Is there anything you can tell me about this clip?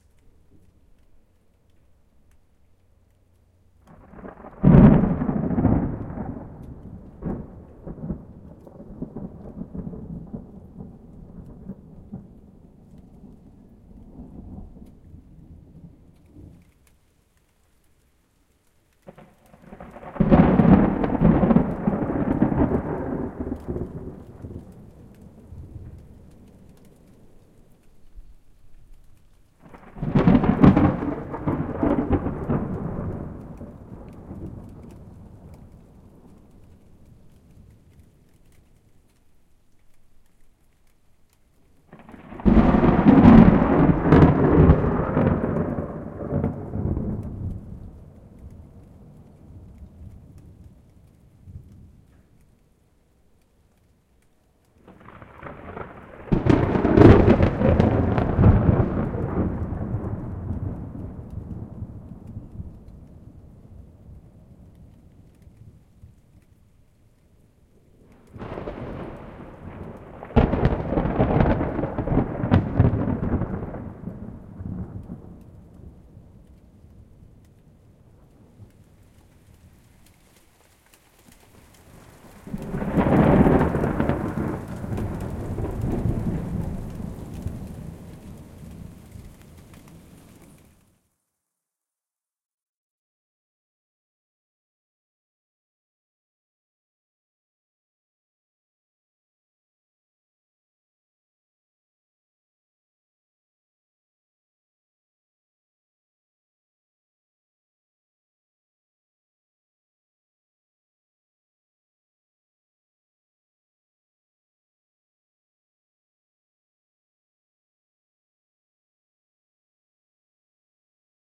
Thunderstorm. Recorded with Zoom h4n